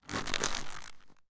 snack bag-1
holding snack bag, Recorded w/ m-audio NOVA condenser microphone.